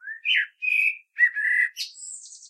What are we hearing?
Turdus merula 31
Morning song of a common blackbird, one bird, one recording, with a H4, denoising with Audacity.
bird, blackbird, field-recording